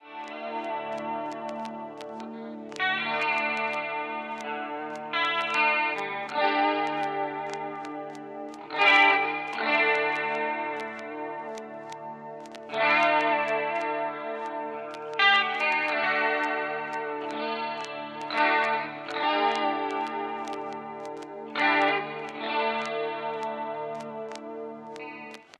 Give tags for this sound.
processed-guitar
guitar
electric-guitar

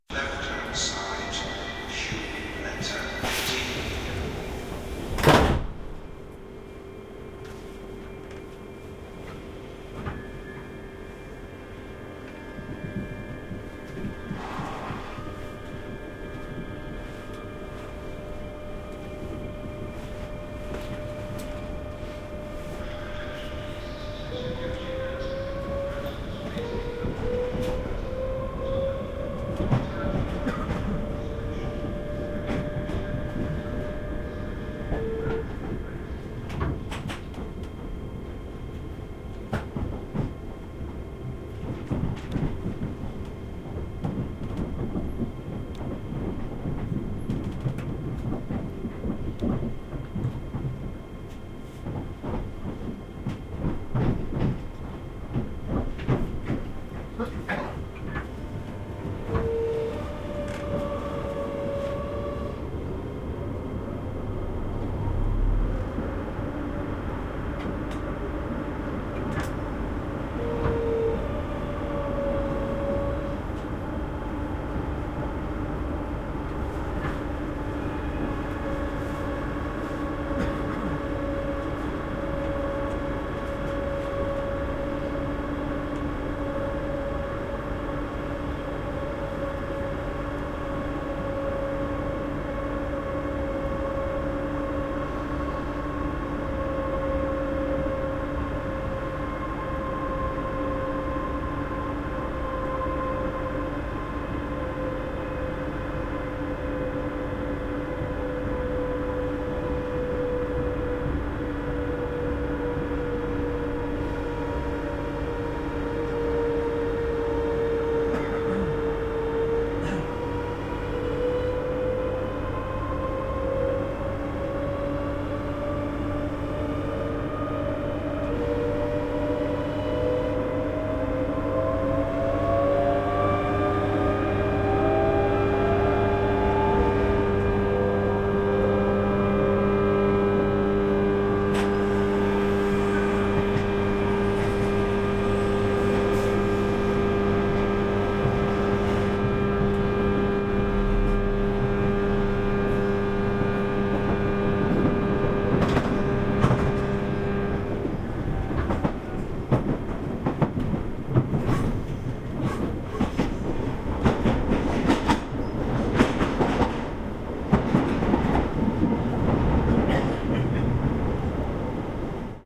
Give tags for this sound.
express
networker
emu
class
365
train

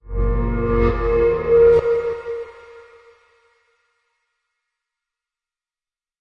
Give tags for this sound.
one
production
live
samples
sample
Dub
electronica
electronic
synth
audio
stab
stabs
shot
music

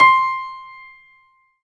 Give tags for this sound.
Grand-Piano
Keys
Piano
Upright-Piano

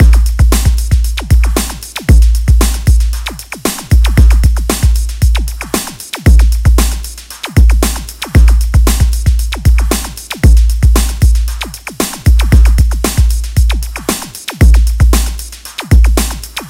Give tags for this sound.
115
115-bpm
115bpm
bass
beat
boom
bpm
break
breakbeat
club
dance
hard
high
hip
hop
industrial
power
quality
sequence
sub
trip
underground

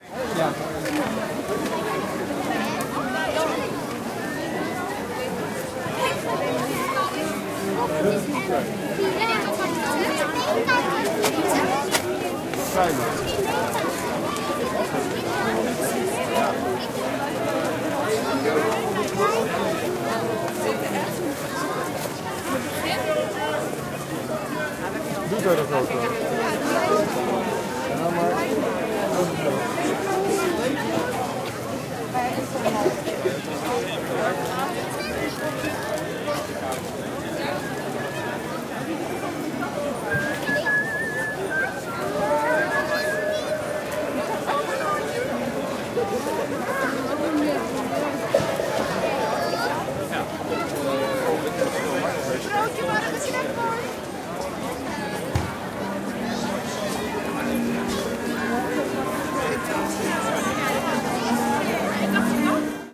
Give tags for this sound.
people
dutch
voices
xy
field-recording
ambience
crowd
talking
children
s-day
stereo
king
external
walla

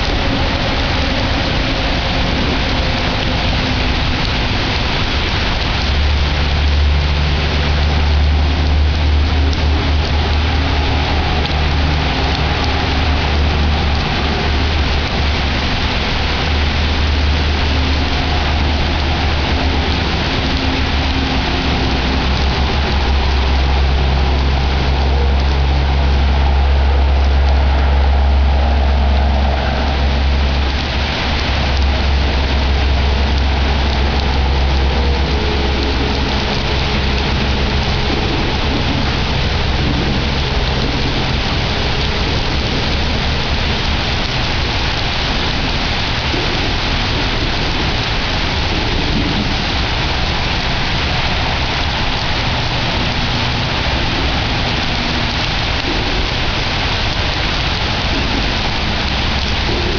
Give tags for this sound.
1-over
ambiance
din
environmental
low-tech
pass
rain
storm

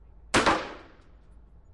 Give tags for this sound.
Gun
Shooting

